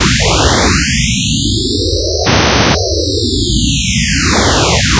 Random noise generator.
generative, noise